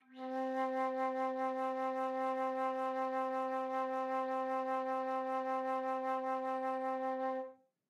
One-shot from Versilian Studios Chamber Orchestra 2: Community Edition sampling project.
Instrument family: Woodwinds
Instrument: Flute
Articulation: vibrato sustain
Note: C4
Midi note: 60
Midi velocity (center): 63
Microphone: 2x Rode NT1-A spaced pair
Performer: Linda Dallimore